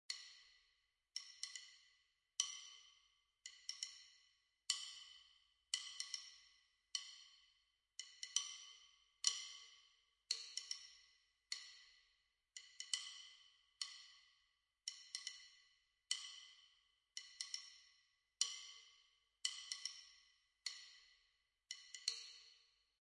Sticks Clack 105 bpm
clack, click, drumsticks, echo, hit, impact, reverb, smack, sticks, wood